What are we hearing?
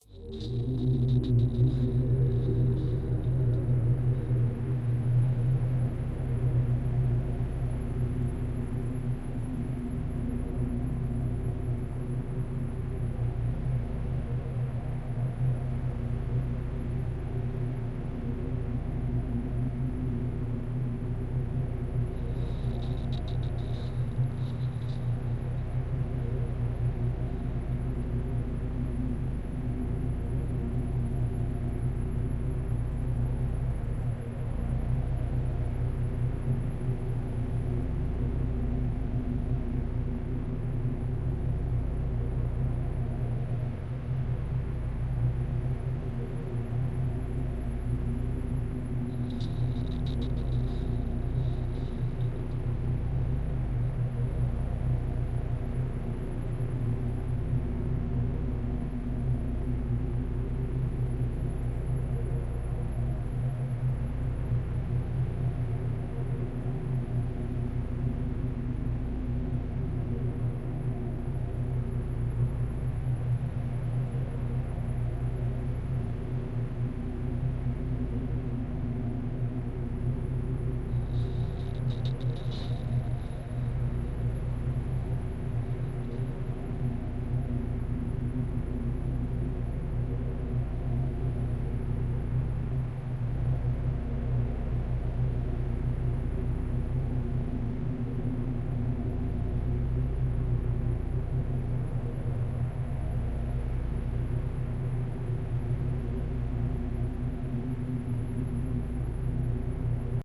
Dark Drone 6
A spooky synth drone for ambience. Long enough to be cut down to a desired length, but simple enough that you could probably find a good looping point if you need it longer.